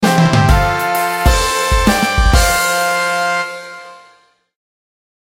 Happy Jingle, that indicates, something good happens, e.g. you won something or you found a useful item.